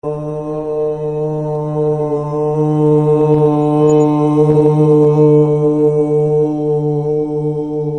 Short recording of two voices droning.
Marantz pro hand-held recorder.
drone, hum, vocal